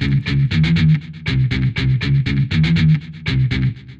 I feel like I should be sneaking around, looking wide eyed over my shoulder, getting something done. Ah nah, it's the weekend :)
Another random short loopable guitar riff.
sneak, riff, spy, mission, guitar, metal, power, loop, electric, heavy, rock